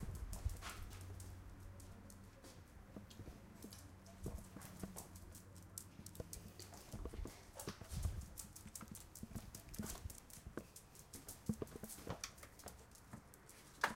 We will use this sounds to create a sound postcard.
barcelona, spain, doctor-puigvert, sonsdebarcelona, sonicsnaps